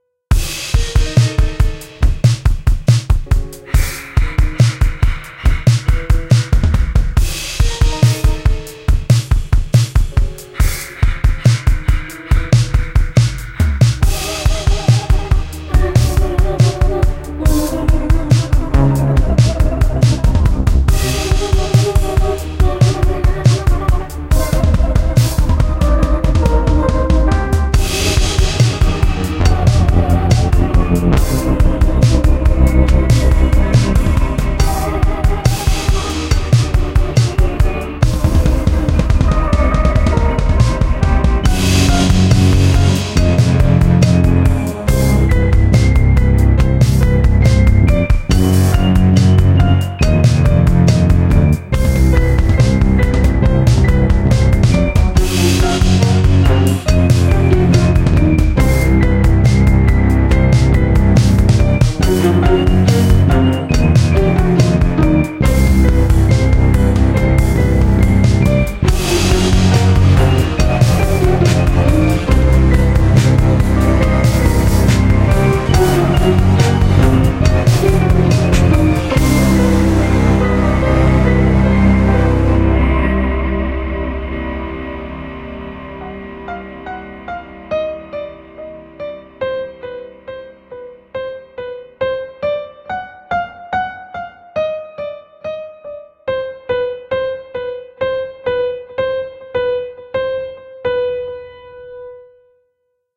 Music for dark moments
Music I made in GarageBand for a thing called Victors Crypt!
This piece of music can be used as an intro, outro or whenever you wanna enhance something dark and eerie.
I believe it would fit anything creepy, scary, haunted. fantasy, horror, terrifying, dystopia or whatever comes to mind.
Cinematic, Eerie, Fantasy, Frightening, Ghost, Haunted, Scared, Scary, Sci-Fi, Sinister, Spooky, Zombie